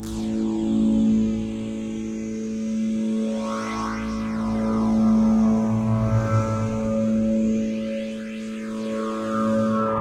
Flanged loop effect for a high voltage device of unknown origin. Meant to be layered with sparks, sizzles, the bluuue light, etc.
Vibrotron_1 is what the machine sounds like on standby. Do not get too close.
Vibrotron_2 is what the machine sounds like after you get too close.
flange, high-voltage, machine, alien, electricity, sci-fi, power-station